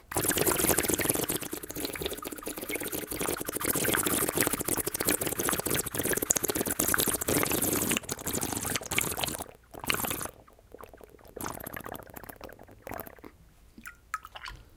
slurpy sounds 3
floop, gush, slurp, water